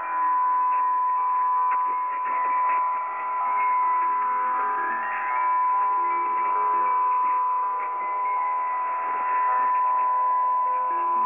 wierd chimes
More creepy melodies from Twente University's online radio reciever. Though the quality isn't as good you can hear something. Another numbers station perhaps?